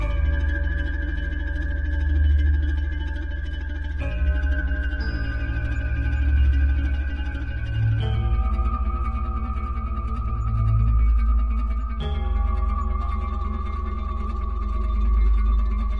Pad Loop - Lurking Eyes 120 bpm Dm
Drone type sound, mixed timbers. metallic container.
Recorded in FL Studio (D.A.W.) RealTek HD
Casio CTK-2400 AMT.
Usage: Useful for background/mystery suspense works. Submarines. Metal influences. Drifting waves, illusive. dream-state. Dark world.
Pad loop recorded at 120 bpm. Focusing on the energy of lurking eyes that watch and wait to see what one does. Keeping track of how far they will discover the truth buried in the sands of time.
anxious, suspense, nightmare, haunted, ghost, Gothic, bogey, macabre, creepy, sinister, bass, creep, weird, drone, atmosphere, thrill, terrifying, lurking-eyes, film, background, loop, terror, ambient, drama, spooky, dramatic, scary, background-sound, phantom